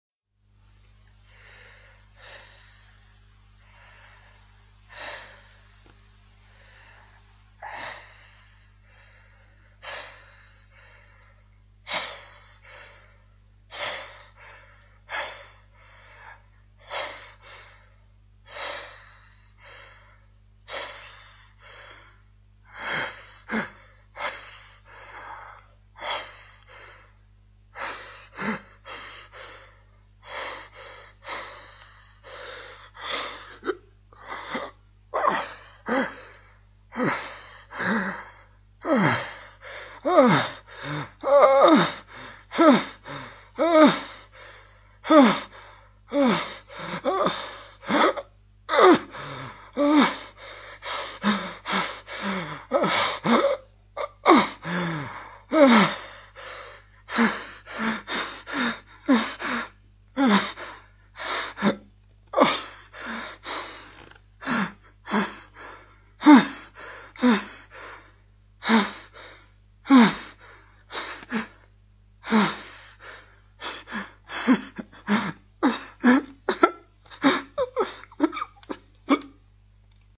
Hard Breathing / Painful
Heavy breathing. Man in pain or with a heart attack.